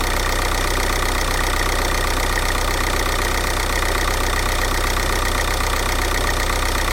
engine One level 2
Diesel engine revving
racing, engine, revving